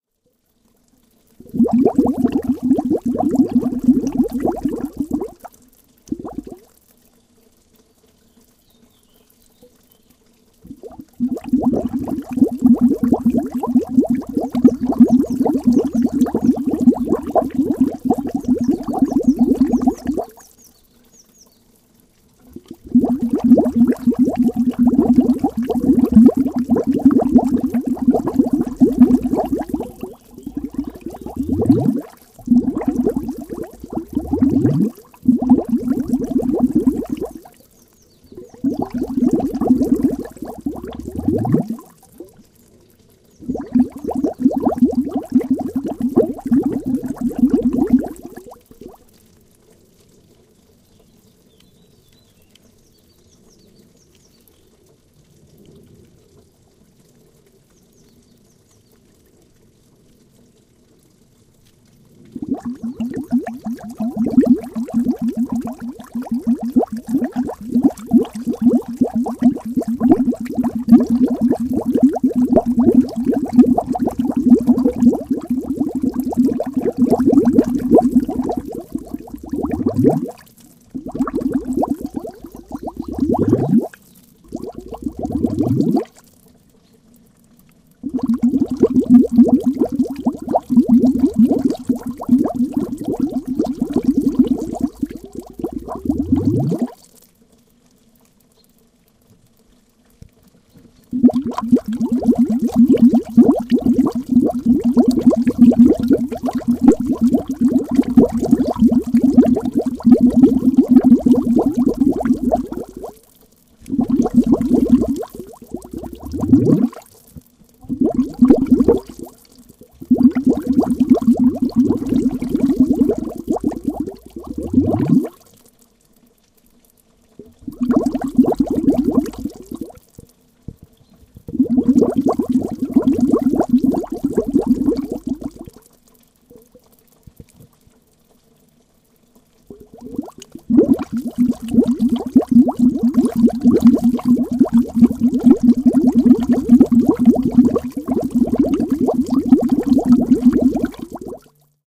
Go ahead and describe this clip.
Bubbles of a little underground stream below a rock in the forest near Bolzano/Italy, H2 built-in micro
bubble, bubbles, creek, field-recording, nature, river, stream, water